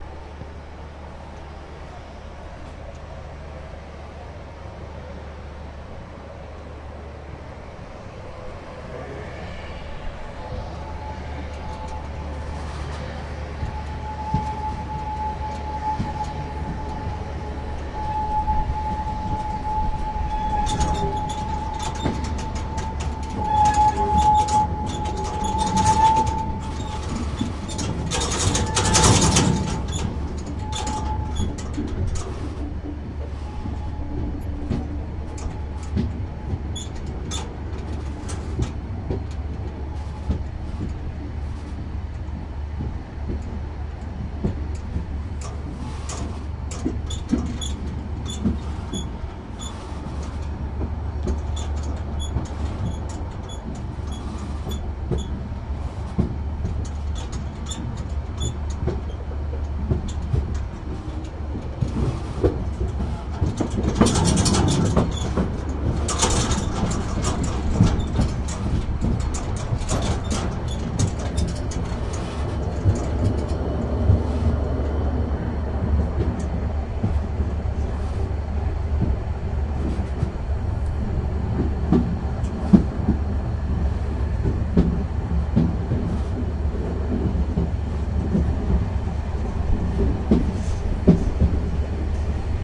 STE-034 inselbahn bahnhof abfahrt
vintage train is leaving langeoog train station. recorded from the exterior platform on the wagon. unaltered footage recorded with zoom h2.
island, departure, train, station, leaving, north-sea, field-recording, langeoog